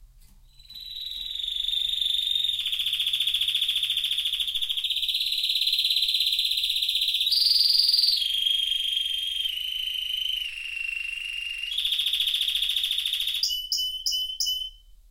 Canarian Bird In Cage Singing SHORT
Sounds singing and noise of the Canarine bird that is locked in small cage.